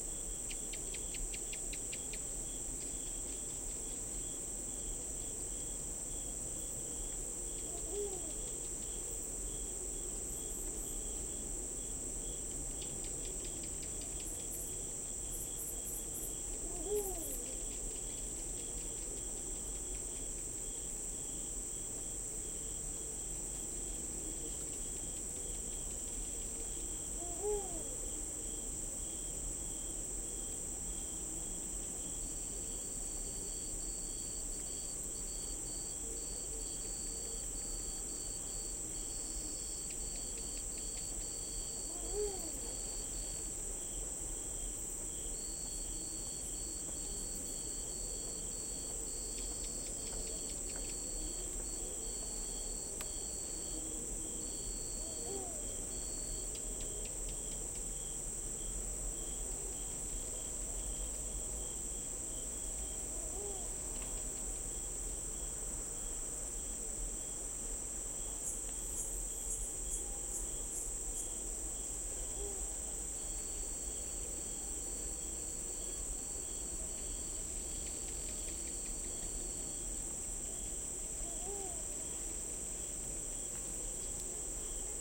crickets night urban park or residential backyard suburbs distant city skyline and owl India
India, distant, residential, urban, crickets, suburbs, park, night, city, owl, or, skyline, backyard